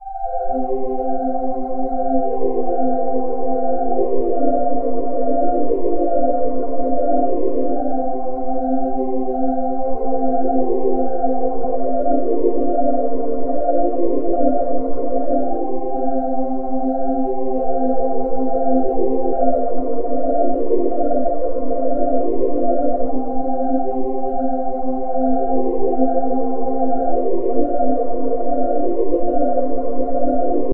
Pad 1 w melody
Pad synthesized in Logic 9
ambience, atmoshpheric, pad